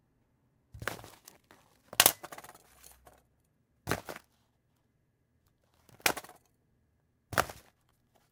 Cigarette Box, Lighter, pickup drop, glass
A set of cigarette box and a lighter that I pickup up and drop down from a glass surface.
Lighter, Up, Drop, Cigarette, Box, Pick